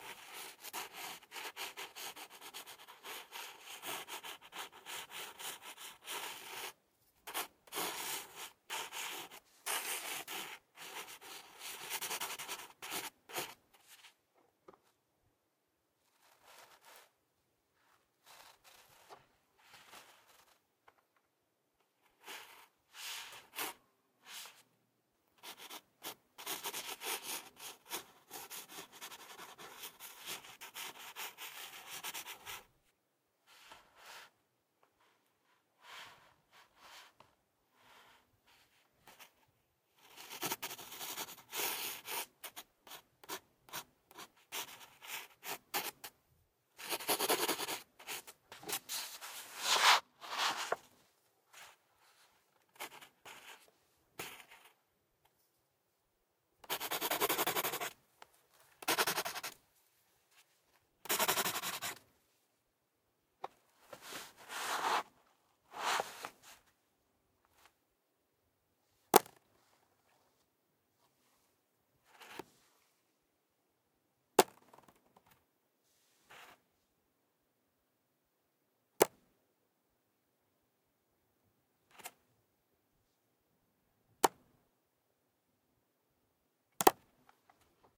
drawing on paper with pencil, paper moving, dropping pencil
Drawing on paper with a pencil (Foley).
Elation KM201 > ULN-2